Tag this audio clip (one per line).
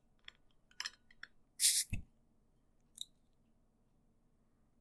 cap
Bottle
reduction
opening
sound